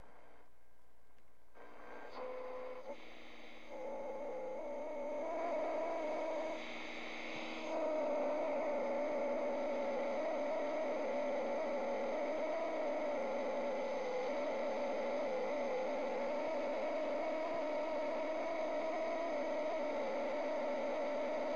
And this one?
my radio makes sounds like an giant sunfish with teeth!